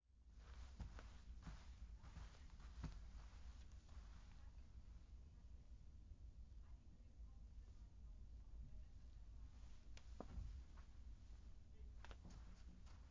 Steps on Carpet Quick
Quick / light steps on carpet with reverb
quick-steps, carpet, steps, walk